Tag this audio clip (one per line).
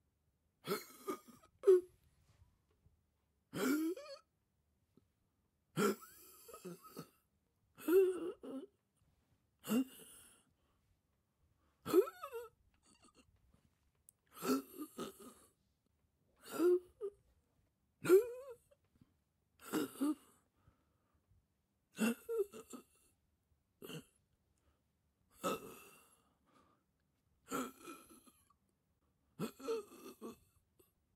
suffocating
starled
design
sound